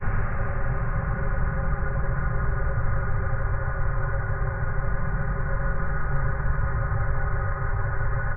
Hollow space drone
Made this Serum patch and used it to create the sound of a spaceship flying by. The results can be found in this pack.
I uploaded this source material before panning and distortion, so you can build your own fly-by. If you want the same distortion settings, just add Tritik's Krush plugin, use the init patch and turn up the Drive to about 60% and Crush to 30%, adjust to taste. Automate the Drive parameter to get that rocket exhaust sound!
alien, drone, engine, exhaust, fly, fly-by, future, futuristic, heavy, hover, sci-fi, sound-design, sounddesign, space, spaceship